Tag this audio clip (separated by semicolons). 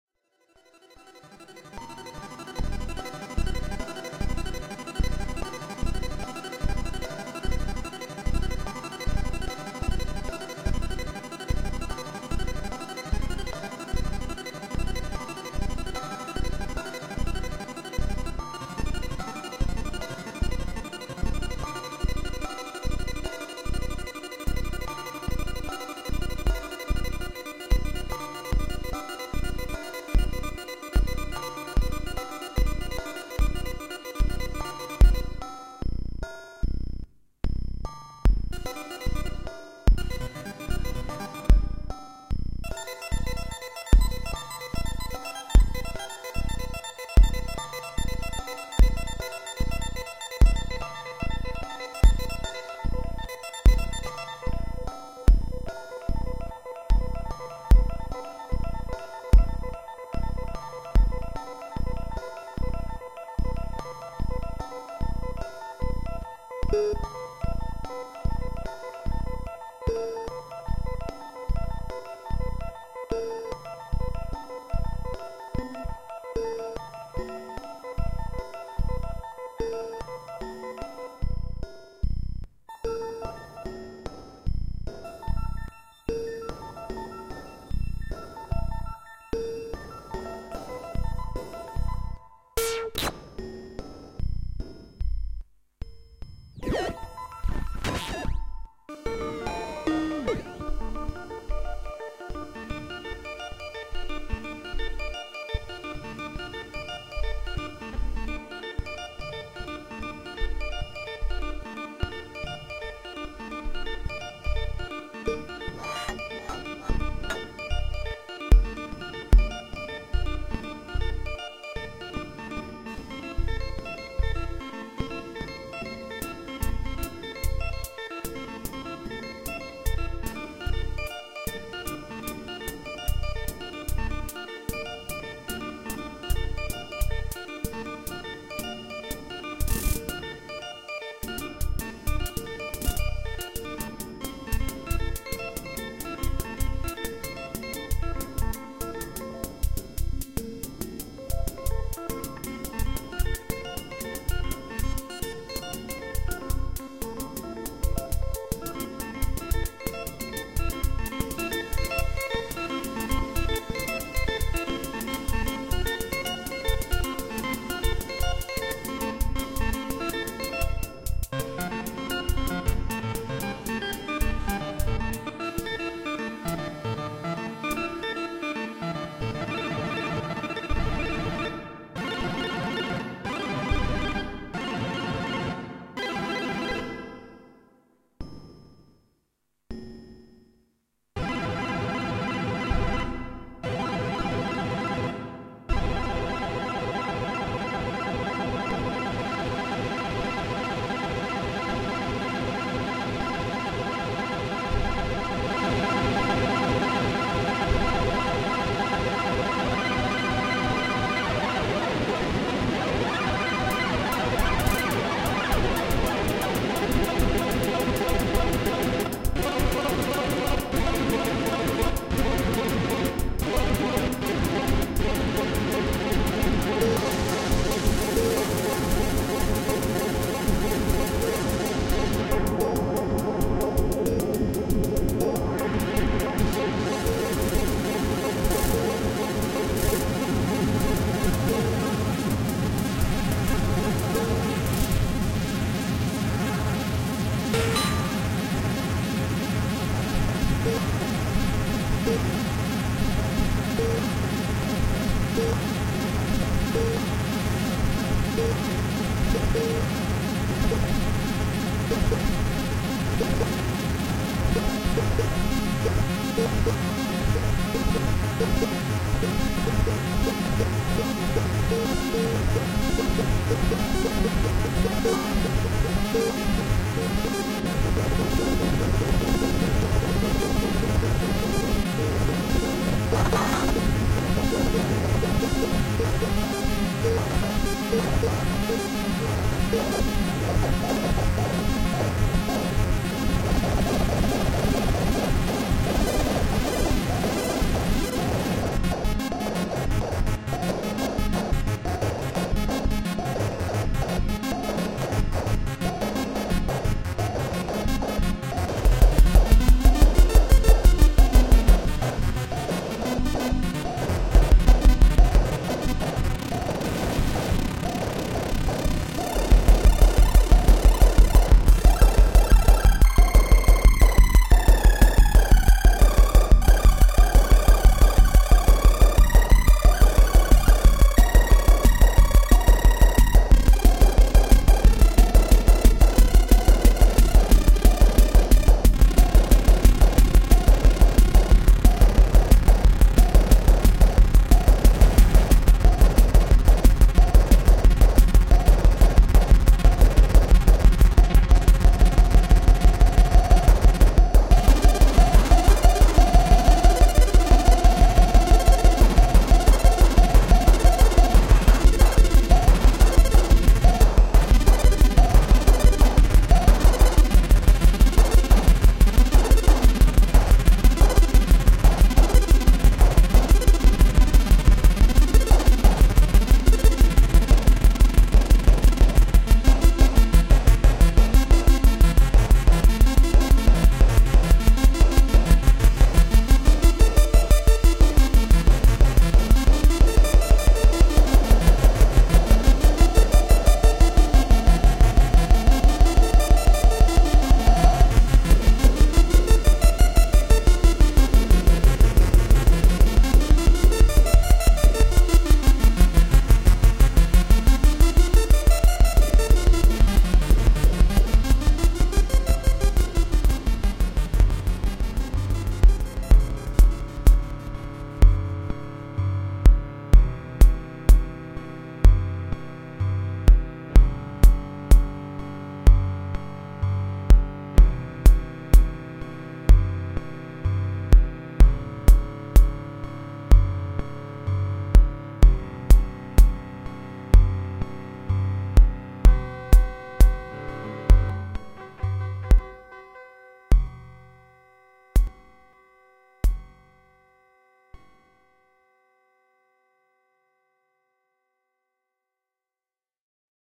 science-fiction; freestyle; experimental; sci-fi; minimal-music; synth; trip; synthesizer; analogue; analog; trippy; noise; psychedelic; beat; spacesynth; jam; arpeggiator; arpeggio; arp; space